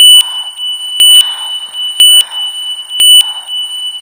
must have health
Low health sound effect for video games. Just breathing recorded with a Mac's built-in microphone with tones generated in Audacity. Added echo to the tones, and reverb for the breathing in Audacity.
breathing, critical, alert, beeps, near-death, hazard, critical-health, low-health, caution, tones, danger, tone, breath, video-game, beep, warning